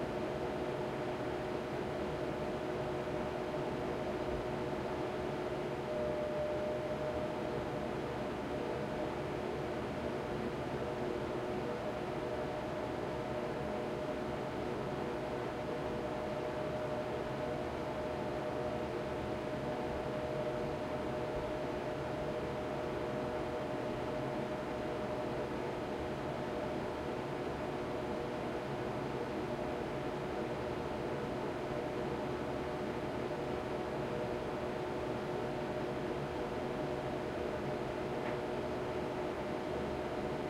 Apartment, small quiet bathroom with fan
Roomtone, small full bathroom in a quiet apartment, fan running
apartment, bathroom, fan, quiet, Roomtone, small